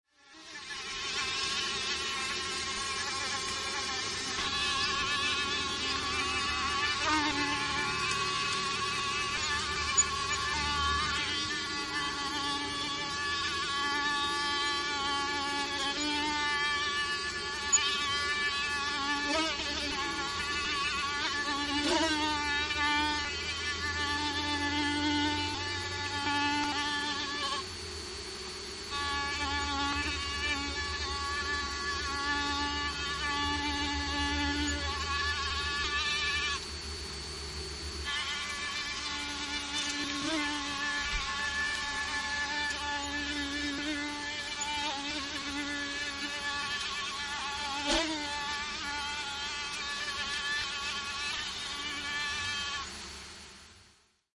Kesä, muutama hyttynen inisee, välillä yksittäinen hyttynen.
Paikka/Place: Suomi / Finland / Lohja, Retlahti
Aika/Date: 08.07.1993